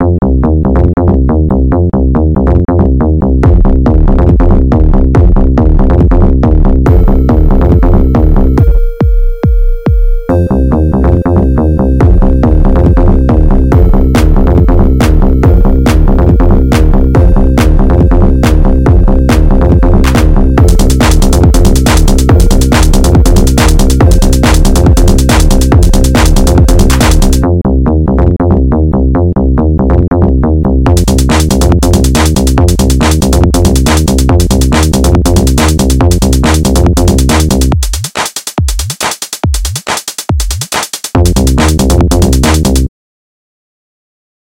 Plays somewhere in Sonic old video games, created in LMMS